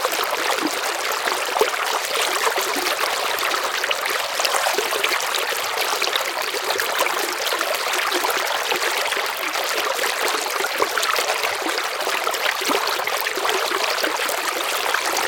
River flow
water, liquid, flow, river, relaxing, stream, creek